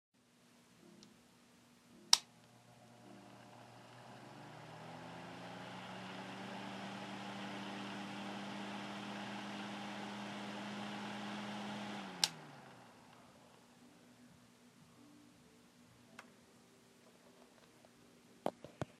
Opening and Closing a Small Electric Fan, which is attached on the edge of a computer table